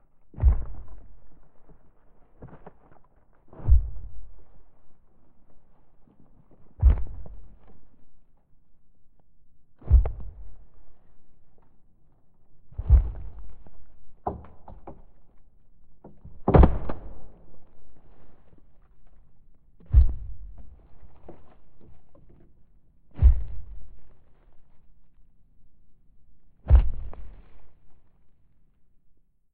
Giant Approaches in Forest
A giant two-legged creature approaches in the forest with crunchy, thumpy stomps. Created in Audacity by changing speed, adding reverb and using a low-pass filter with this sound:
Combined with a sample from this sound for a heavy thump:
stomping, footsteps, approaching